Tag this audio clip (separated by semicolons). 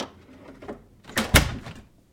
Wooden; Close; shut; Heavy